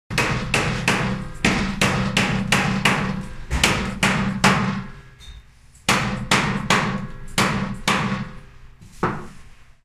Sound from a blacksmith hitting metals with hammer.